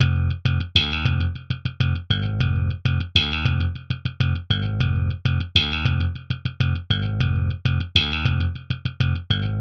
BL SL004 100
slapped bassline, processed with guitar rig (100 bpm)
bassline, slap, 100, electric, bass, bpm